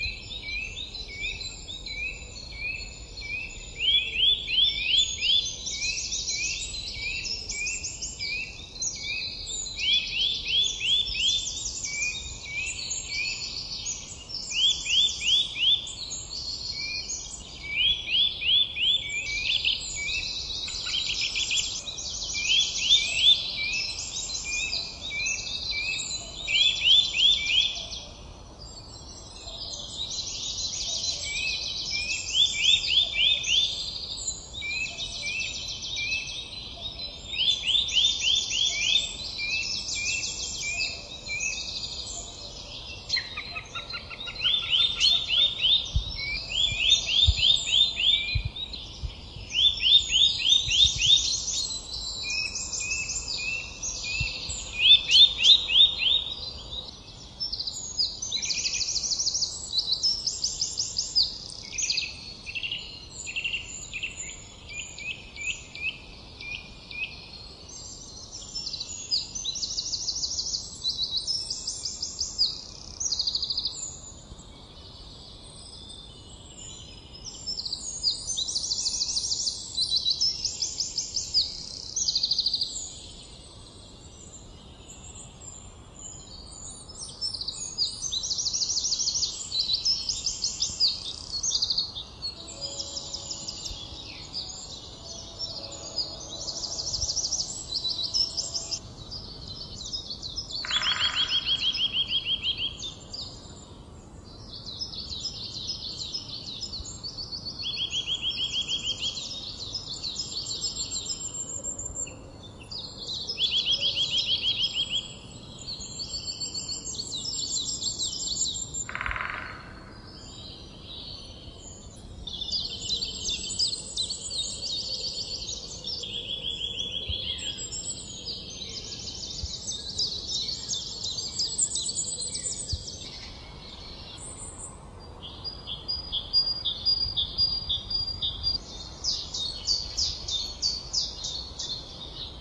Vogelstimmen im Niedtal
A recording of the concert of birds in the spring in the valley of the Nied (Saarland, Germany). Features many different birds. In the second half there are some distant bells from the next village audible. Recorded with Tascam DR-100 recorder and Rode NT4 microphone.